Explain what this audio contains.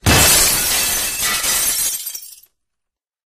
Sound of breaking window glass.
glass, breaking, window